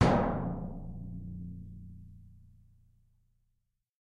Plat mŽtallique gong mp lg
percussion, household